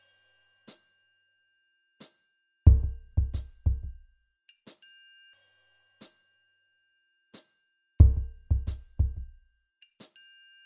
Produced for ambient music and world beats. Perfect for a foundation beat.